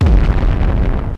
progressive psytrance goa psytrance